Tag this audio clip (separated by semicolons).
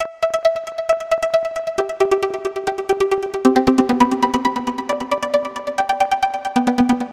electronica; acid; dance; synth; trance